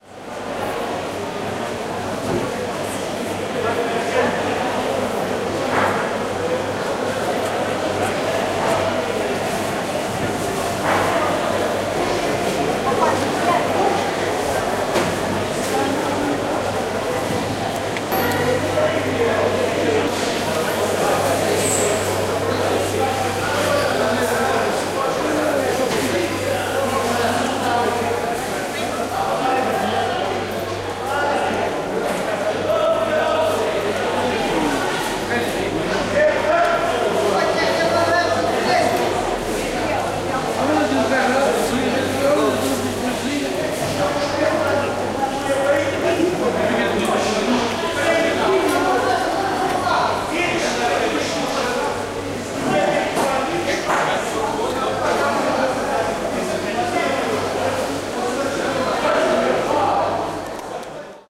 Portugese Fish Market
Busy market in a town in Portugal. Atmospheric background for film or audio projects.